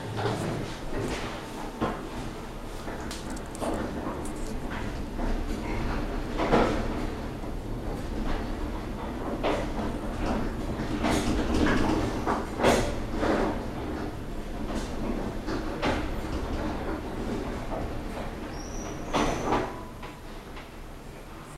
Otis Elevator Running
elevator, lift, machine